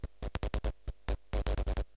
inspired by ryoji ikeda, ive recorded the sounding of me touching with my fingers and licking the minijac of a cable connected to the line-in entry of my pc. basically different ffffffff, trrrrrrr, and glllllll with a minimal- noisy sound...